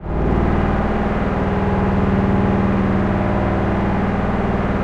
STRINGY-4791-2mx2PR D#4 SW
37 Samples Multisampled in minor 3rds, C-1 to C8, keyboard mapping in sample file, made with multiple Reason Subtractor and Thor soft synths, multiple takes layered, eq'd and mixed in Logic, looped in Keymap Pro 5 using Penrose algorithm. More complex and organic than cheesy 2 VCO synth strings.